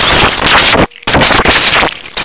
Another cool sound made from circuit bending a toy I found in my closet.